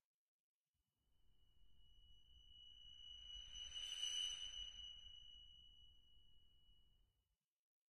I created this shine sound effect after searching and searching for something like it, but obviously to no avail. So, I recreated it myself.
I do a lot of work with cinematic sound design!